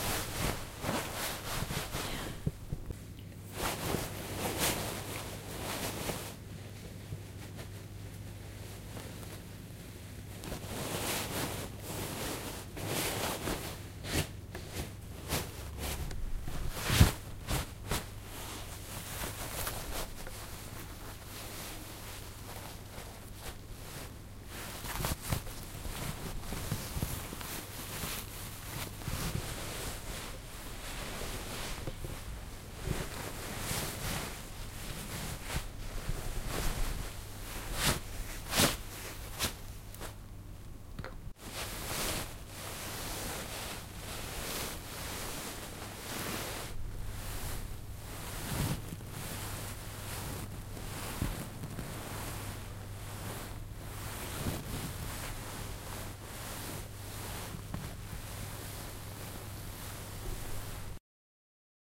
Playing with the fabric in a satin dress
Just some general messing around with the fabric of a satin dress